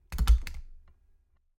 Stapler Desk 03
Collection of sounds from a stapler. Some could be used as gun handling sounds. Recorded by a MXL V67 through a MOTU 828 mkII to Reaper.